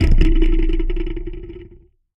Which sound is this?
tweezers boing 7
Tweezers recorded with a contact microphone.
close, contact, effect, fx, metal, microphone, sfx, sound, soundeffect, tweezers